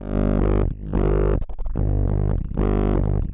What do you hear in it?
sherman cable41
I did some jamming with my Sherman Filterbank 2 an a loose cable, witch i touched. It gave a very special bass sound, sometimes sweeps, percussive and very strange plops an plucks...
ac, analog, analouge, cable, current, dc, electro, fat, filter, filterbank, noise, phat, sherman, touch